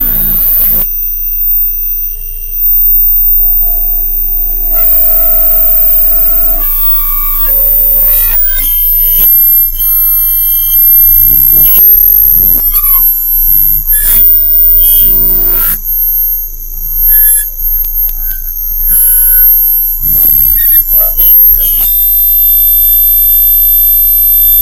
Car Stereo Feedback
The end result of plugging your Tascam DR-05 recorder into the auxiliary output of my Chevrolet Aveo's car stereo: pure auditory madness!
Have a blessed day!
sound-effect, testing